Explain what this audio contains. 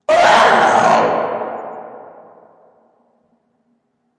Monster shriek #2
I edited my voice with Audacity to sound like a monster. I added some reverb too.